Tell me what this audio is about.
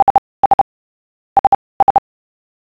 An attention-getting tone. A pager, for example.